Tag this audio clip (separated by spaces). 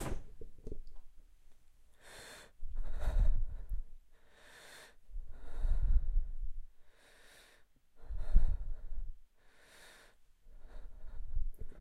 Breathing Female Heavy Horror Scared Scary Woman